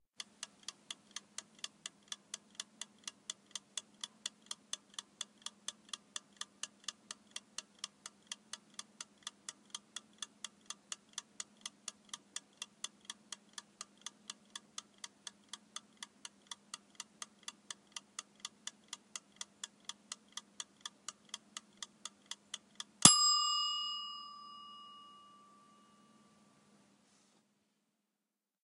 timer with ding
An egg timer with a bell ding at the end.
bell, ding, egg-timer, tick, ticking, timer, timer-bell